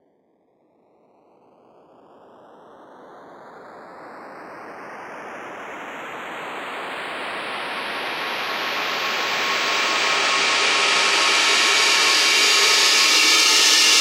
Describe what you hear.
Riser Cymbal 01
Riser made with Massive in Reaper. Eight bars long.
edm, riser, trance